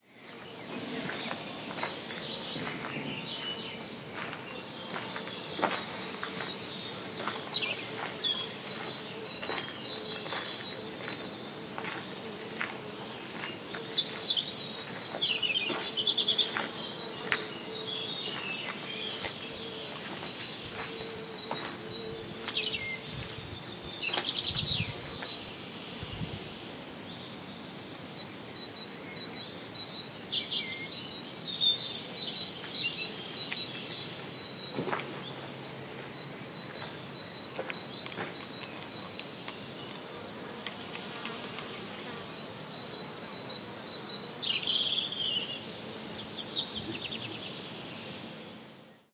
birds & steps on gravel
birds and steps on gravel on a graveyard
bird, birds, field-recording, gravel, nature, steps, walk